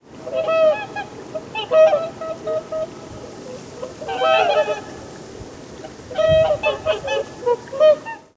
sangsvan-short
Field recording 15 meters from two swans (Cygnus cygnus). Recorded with Edirol R-09. Edited part of a longer stereo recording.